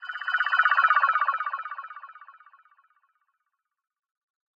Out of sheer boredom, I created an alien abduction sound from a single glockenspiel sample.
spaceship, fiction, galaxy, space, ufo, sci-fi, science, abduction, robot, alien, game, artificial